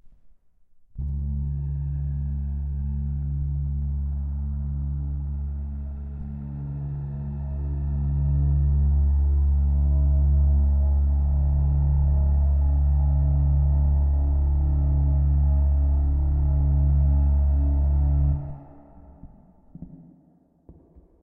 Free drone. Recorded using homemade contact microphones. The OS-XX Samples consist of different recordings of fans, fridges, espressomachines, etc. The sounds are pretty raw, I added reverb, and cut some sub. I can, on request hand out the raw recordings. Enjoy.
Eerie, Ambient, contact-mic, Atmosphere, Drone, Sound-design